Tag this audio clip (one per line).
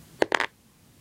impact
hit
wooden
drop
wood
block
crash